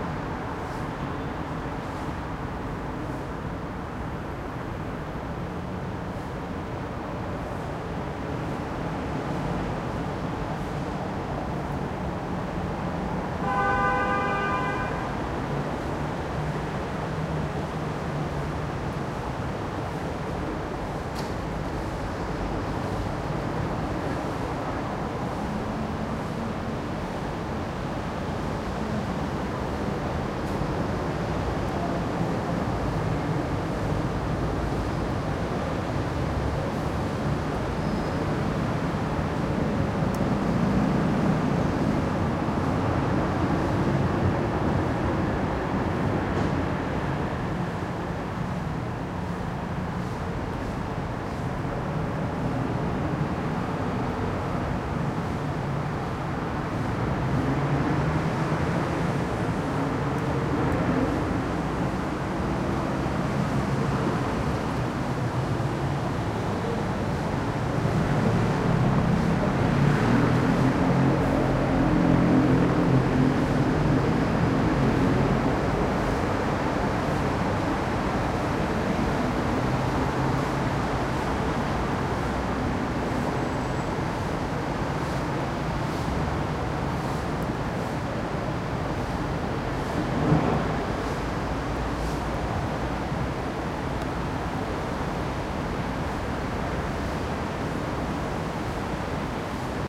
140812 Vienna SummerMorningWA F
Wide range 4ch surround recording of the summer morning cityscape in Vienna/Austria in the 13th district by Schönbrunn Castle. The recorder is positioned approx. 25m above street level, providing a richly textured european urban backdrop.
Recording conducted with a Zoom H2.
These are the FRONT channels, mics set to 90° dispersion.
field-recording, cars, traffic, Europe, Wien, Vienna, morning, urban, surround, street, city, Austria